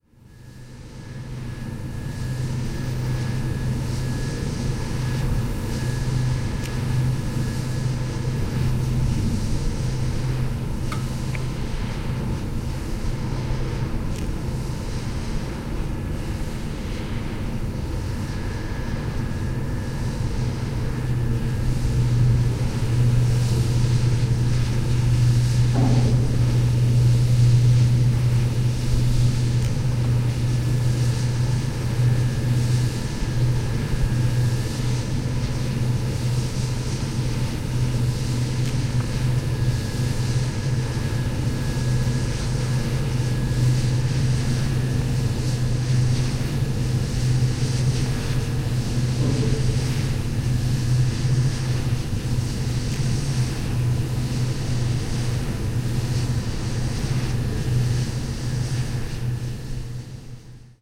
Binaural field-recording of the noise generated by a wind turbine. Beyond the aerodynamic noise, some other metallic noises can be heard.